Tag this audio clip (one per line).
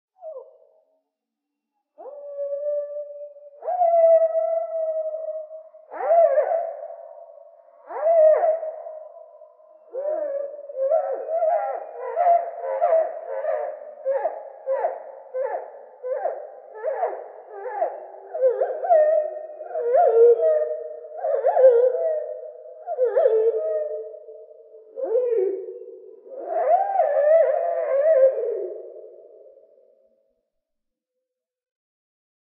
Exotic
Call
Forest
Vocal
Alien
Birdsong
Song
Bird
Creature
Nature